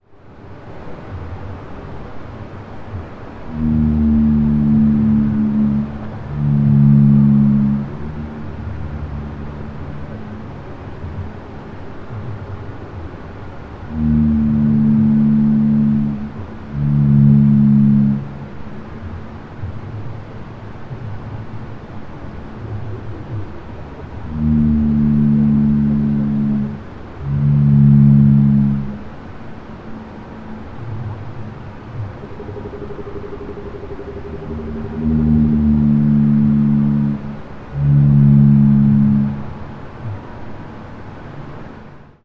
This is a series of Blue Whale B calls recorded by an Ocean Sonics icListen HF hydrophone on the MARS deep-sea cabled observatory off Monterey Bay, California (900 m depth). The start of the recording is 6-Nov-2015 23:07:26. Playback speed is 5X, to make the very low frequency vocalization audible without a subwoofer.